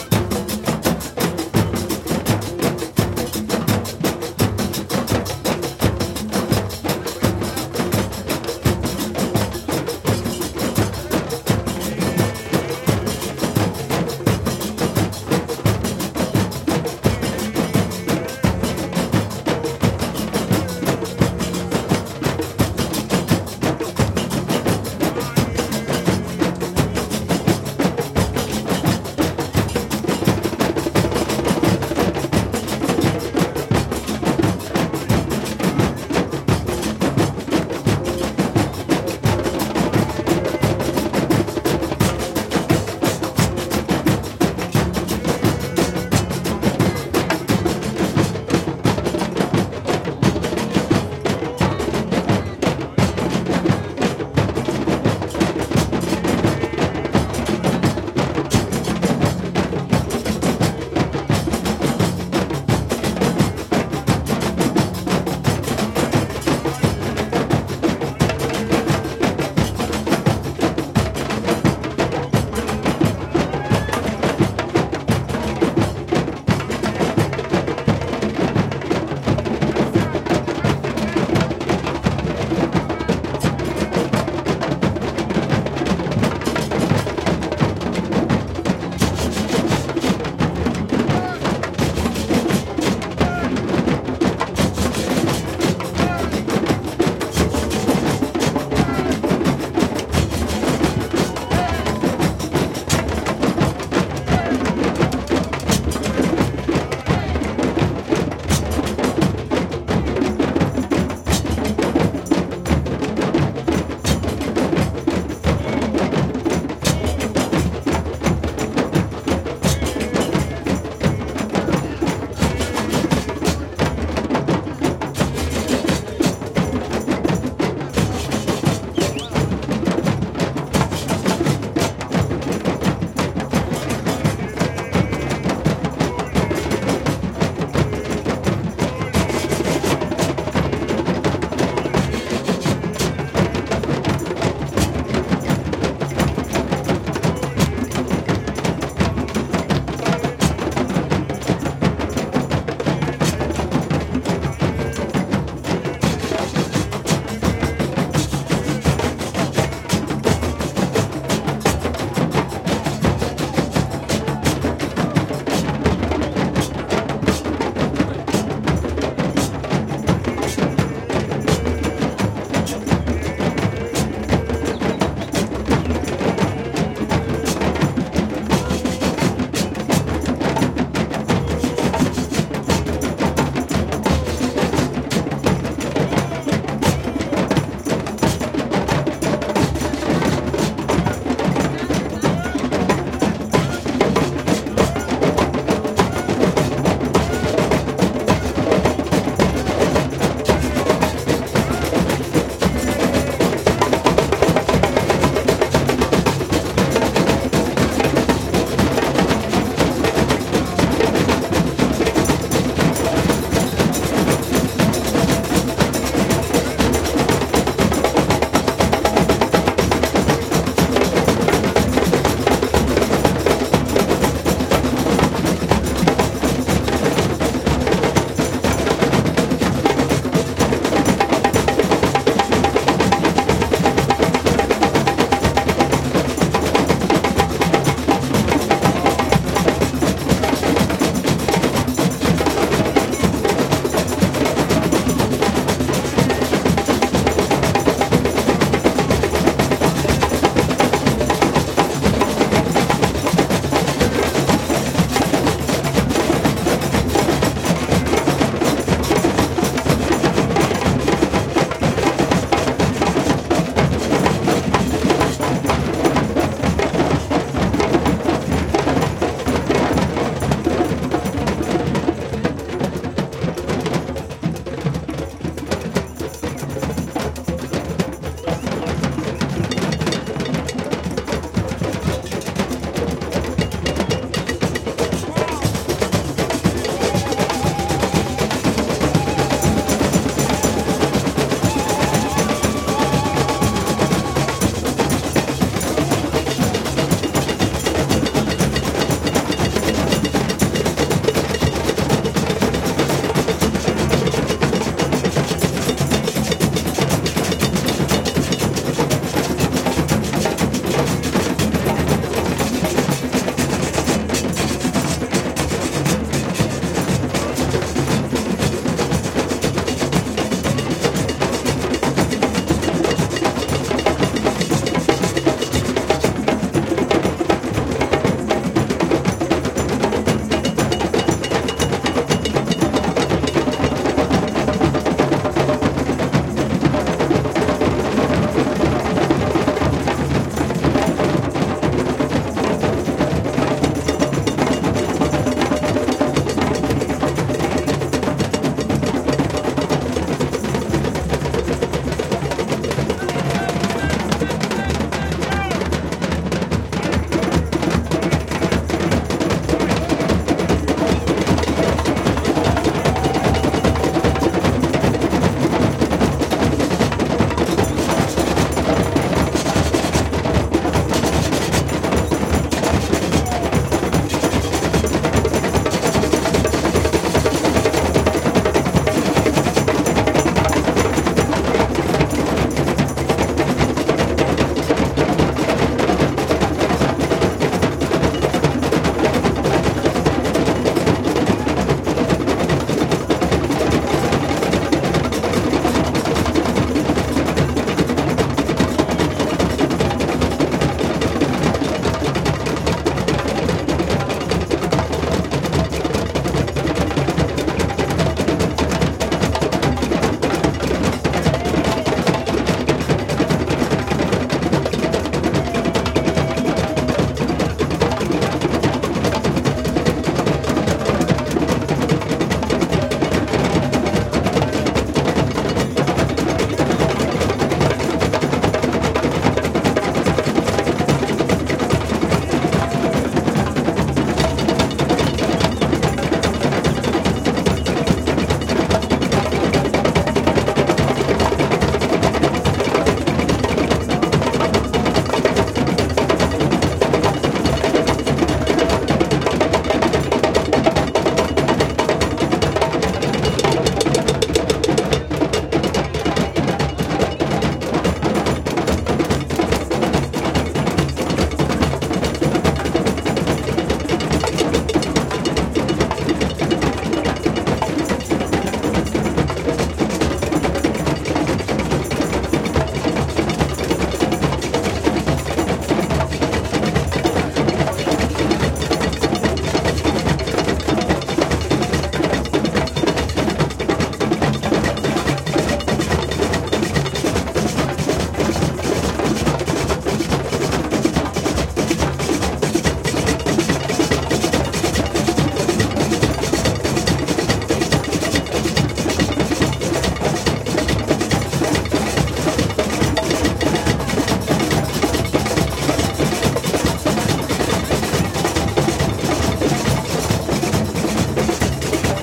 drum circle Tamtams5 Montreal, Canada
Canada, circle, drum, Montreal, Tamtams